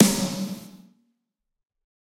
Snare Of God Wet 035
pack, drumset, realistic, set, snare, kit, drum